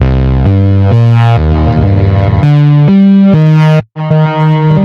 20140316 attackloop 120BPM 4 4 Analog 1 Kit ConstructionKit BassAmpedWeirdDelay2
This loop is an element form the mixdown sample proposals 20140316_attackloop_120BPM_4/4_Analog_1_Kit_ConstructionKit_mixdown1 and 20140316_attackloop_120BPM_4/4_Analog_1_Kit_ConstructionKit_mixdown2. It is the bass loop which was created with the Waldorf Attack VST Drum Synth. The kit used was Analog 1 Kit and the loop was created using Cubase 7.5. Various processing tools were used to create some variations as walle as mastering using iZotope Ozone 5.
120BPM, ConstructionKit, bass, dance, electro, electronic, loop, rhythmic